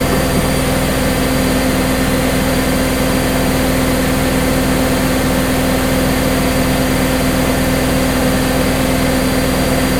washing machine 10sec MORPHAGENE
A washing machine running for 10 seconds.
ambient, machine, morphagene